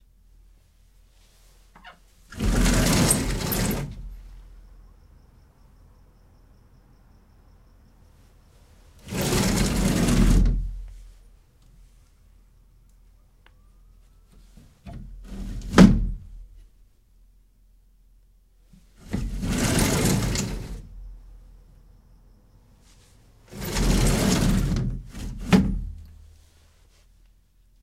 Puerta corrediza T1
puerta corrediza del baño. sliding door of a bath
ba, bath, corrediza, door, o, puerta, sliding